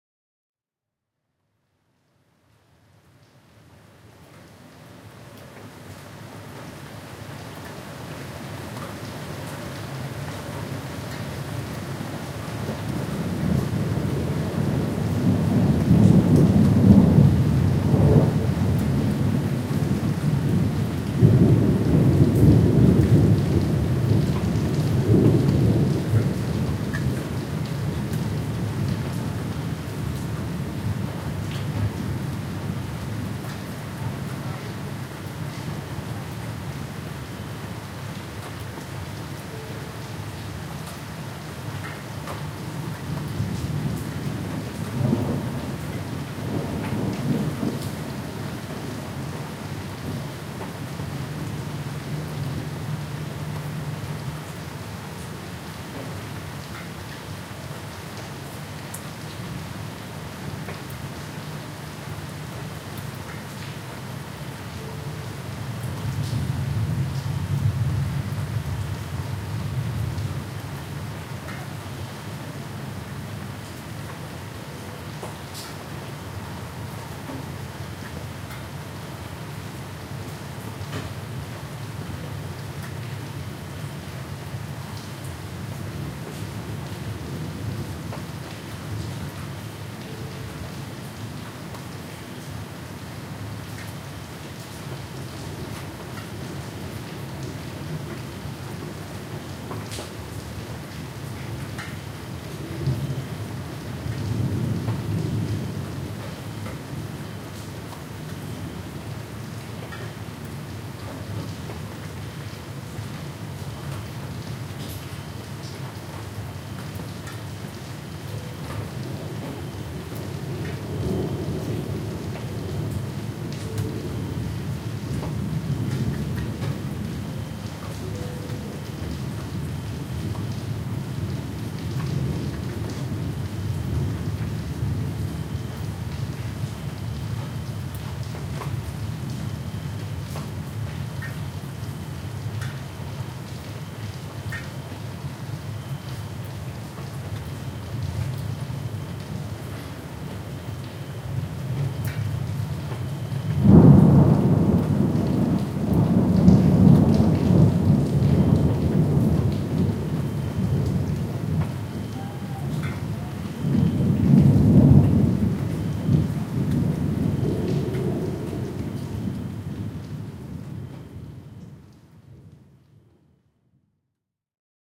Thunder, Rain, Next to window, -23LUFS
Recorded in Budapest (Hungary) with a Zoom H1.
ambience, atmosphere, distant, drop, field-recording, lightning, music, nature, rain, raindrop, raining, storm, thunder, thunder-storm, thunderstorm, weather, window